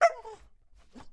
Beagle Bark Whimper Interior Hard Walls 07
this is a recording of a Beagle barking/making Beagle sounds.